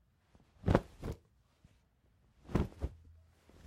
Body falls made by my arms and some cloth